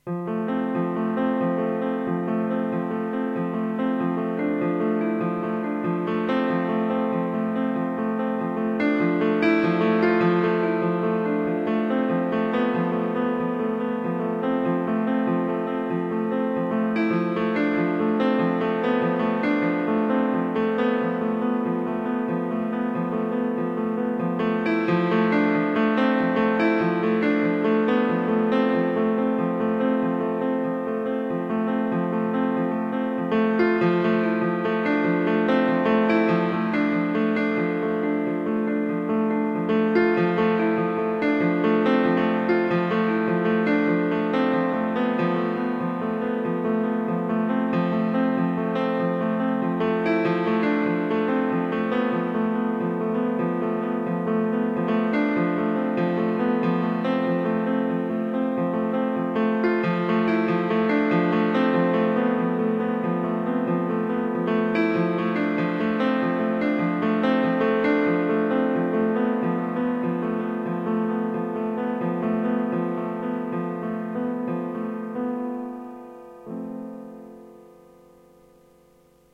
Marianna Piano Melody
Piano melody for my Grandmother.
easy, sad, slow, marianna, piano